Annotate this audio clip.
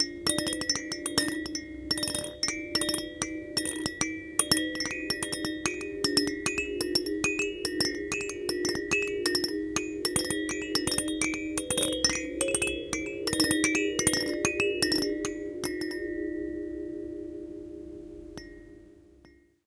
baby bell rattle 05
A baby bell/rattle. Recorded using a Zoom H4 on 12 June 2012 in Cluj-Napoca, Romania. High-pass filtered.
baby, bell, child, rattle, toddler, toy